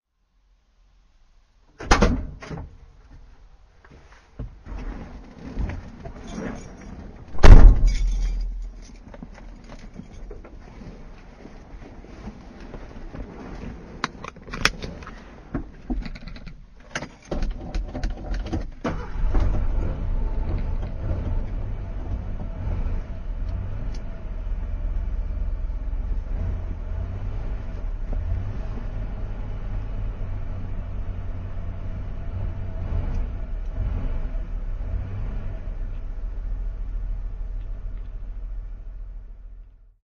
OPEN TRUCK DOOR & START
(Update-June 15th, 2022. The truck is still running strong). :-) This is my Ford Ranger with a 170 V-6. I enter, shut the door, put in key, click seat belt, put tranny in neutral, pump gas, start and warm it up a bit. Thanks. :^)
belt, door, ignition, Opening, seat, truck